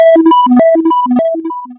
colson sound2
bip, experience, rhythmic, short, sound, strange